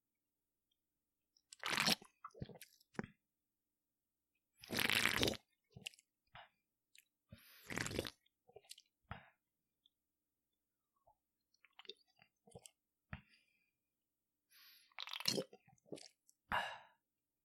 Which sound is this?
Sips From Can - Multiple
Multiple sounds of taking a sip from a can.
ahh, can, sip, beer, soda, drink